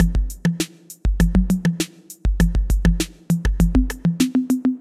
A 100 BPM, 2 measure electronic drum beat done with the Native Instruments Battery plugin